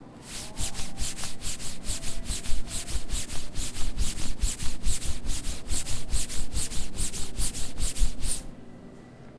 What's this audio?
Swooshy Coat CirculatorySystem Original
Sliding a hand against a puffy coat.
abstraction, FND112-ASHLIFIORINI-ABSTRACTION, syracuse